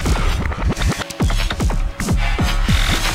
Travel to the depths of Parallel Worlds to bring you these 100 sounds never heard before...
They will hear sounds of the flight of strange birds if they can be called that, of strangely shaped beings that emitted sounds I do not know where, of echoes coming from, who knows one.
The ship that I take with me is the Sirius Quasimodo Works Station, the fuel to be able to move the ship and transport me is BioTek the Audacity travel recording log Enjoy it; =)
PS: I have to give up the pills they produce a weird effect on me jajajajaja